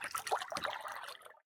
Water Paddle soft 023
Part of a collection of sounds of paddle strokes in the water, a series ranging from soft to heavy.
Recorded with a Zoom h4 in Okanagan, BC.
splash, zoomh4, lake, boat